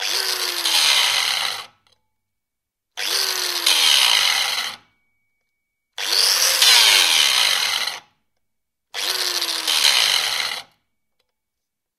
Angle grinder - Milwaukee 125mm - Stop 4 times
Milwaukee 125mm angle grinder (electric) turned on and pushed against steel four times times.